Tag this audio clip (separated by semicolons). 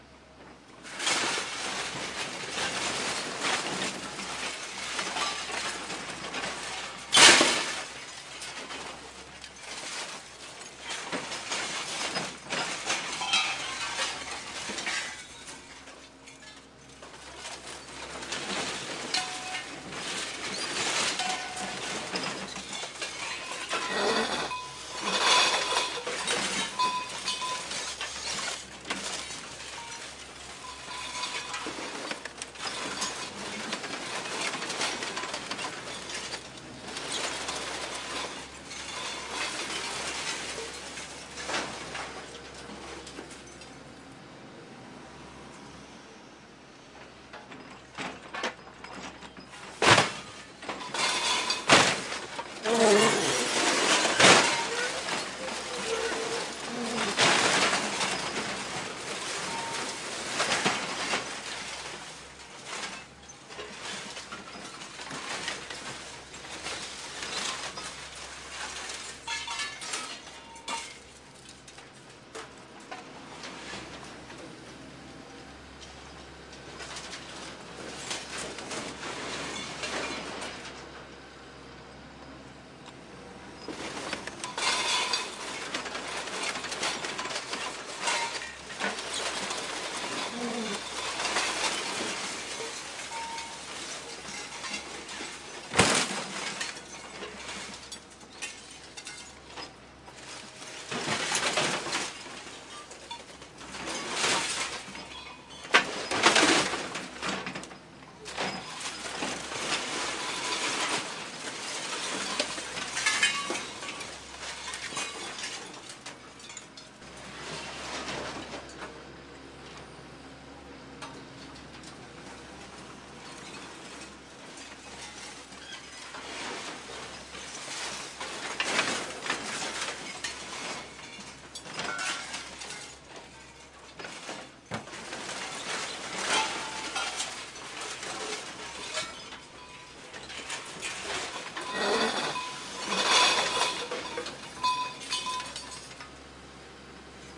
maquinaria; metales; Piedralaves; machinery; Spain; excavadora; backhoe; metal-noise